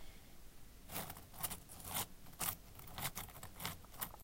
Short potpourris rustling sound made by stirring a bowl of it
crackle, potpourris, scrunch